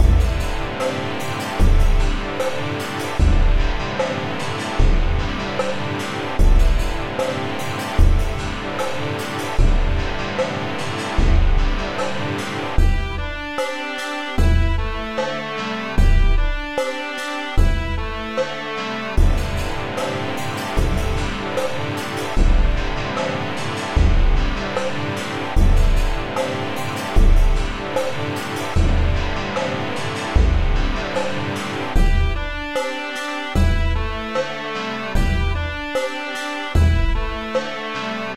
atmospheric loop #3
music, atmosphere, sample, soundtrack, drums, melody, ambience, bass, loop, ambient, background, beat